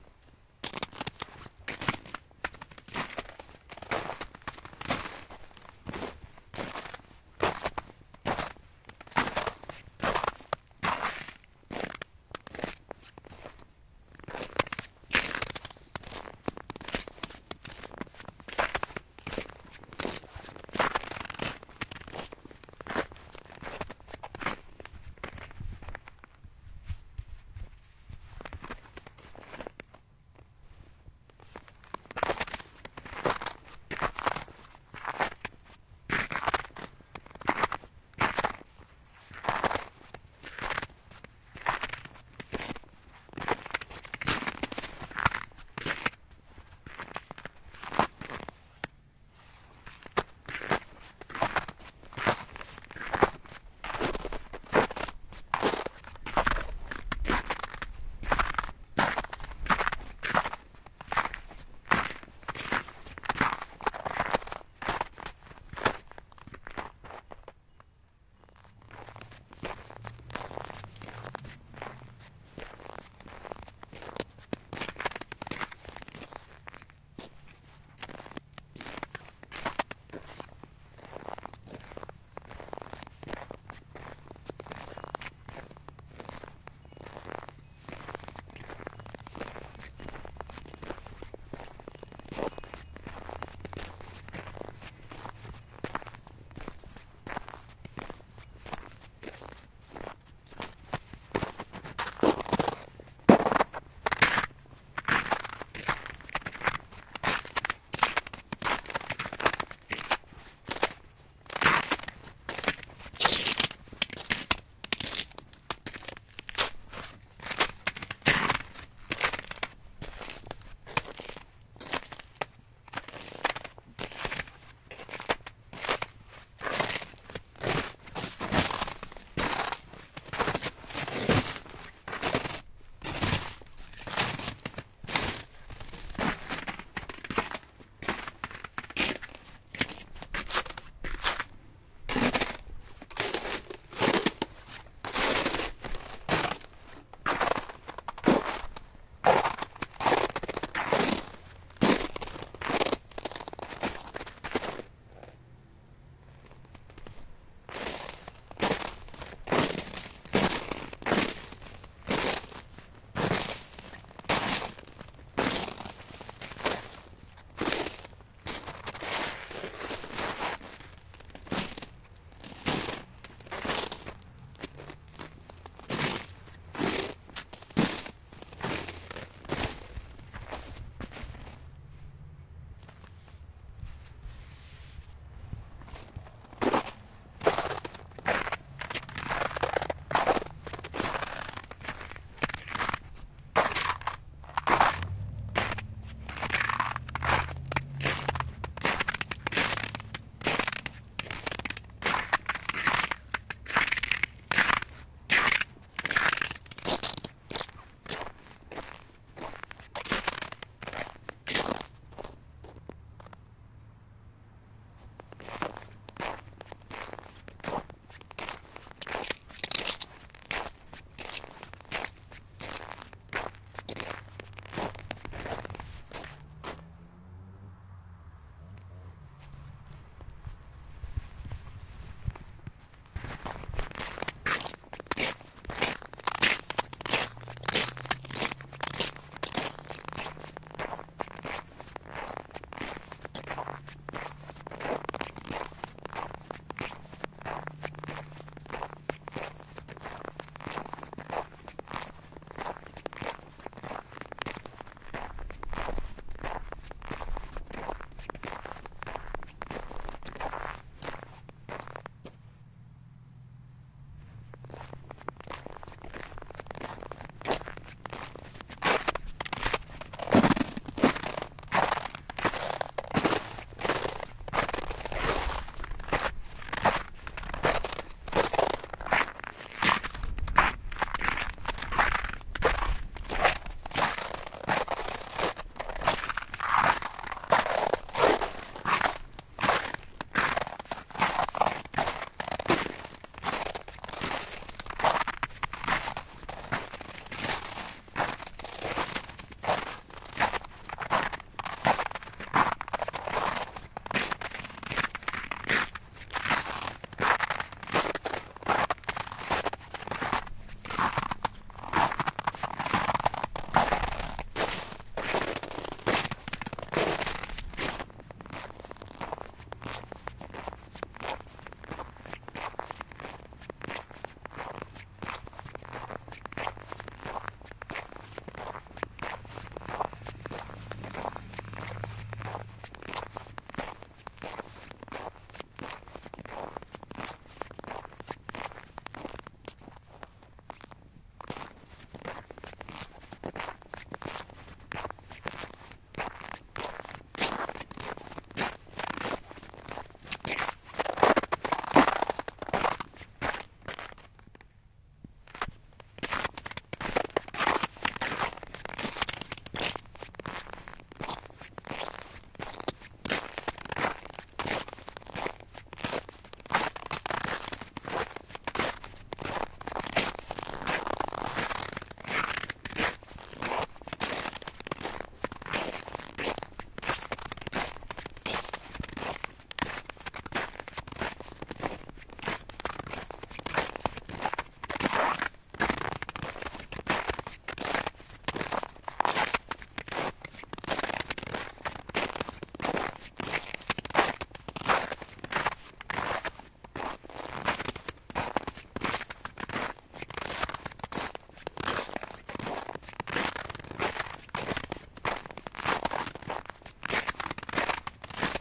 Since snow has been so widespread, thought it would be a good time to stockpile the beautiful crunchy, crisp sound of walking on it. This was recorded the evening of February 15, 2021 using my Marantz PMD661 audio recorder and the bulletproof, dynamic Beyerdynamic ME58 microphone. The temperature was 2 degrees farenheit.
Walking, Ice, Snow, Winter, Cold